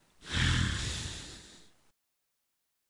Just me perforing a hoarse grunt
Used it in my cartoon Gifleman